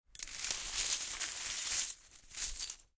Broken glass shuffled and gathered on a felt mat. Close miked with Rode NT-5s in X-Y configuration. Trimmed, DC removed, and normalized to -6 dB.